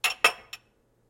short hits, ceramic clinking together
cups, clink, ceramic